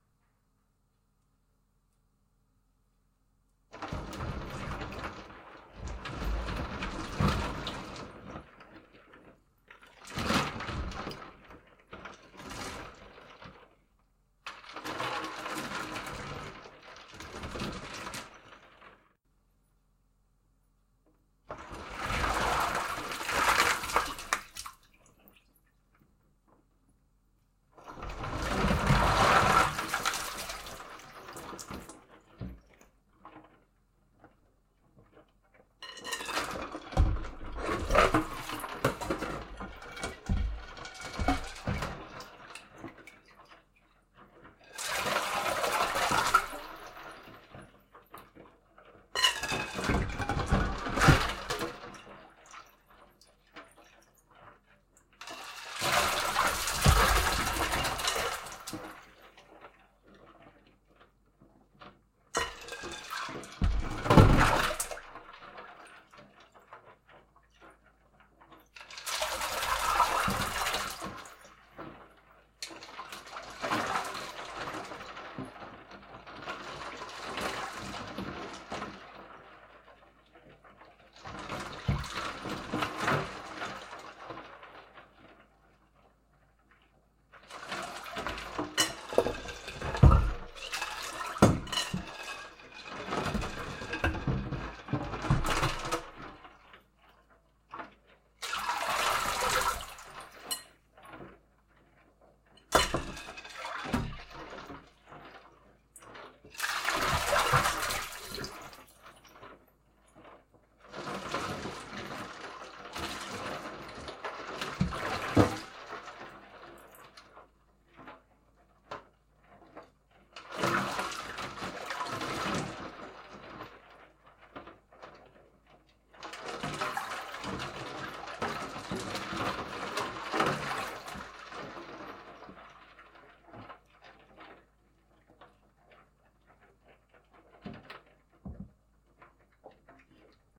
Ice Cubes And Water In Metal Sink
I needed a sound effect for an audio project, specifically the sound of someone moving around in a bathtub full of water and ice.
Being too lazy to go out and get a carpload of ice cubes, I raided the ice-maker in the refrigerator and built up a stockpile, then dumped them all into the metal kitchen sink, added some water and a few empty ice cube trays for flavor, and stirred them about using a glass mixing bowl and a wooden spoon.
stirring, moving, water, sink, ice, metal